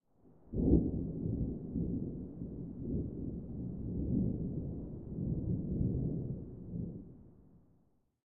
Distant Thunder 3
Sudden boom of distant thunder. Short and subtle. Makes for good storm background noise or as part of a large explosion's reverberation.
A bit low quality and is intended to be played at a low volume.
Recorded with a H4n Pro (built-in mics) 16/10/2019
Edited with Audacity (v2.3.2) 22/07/2021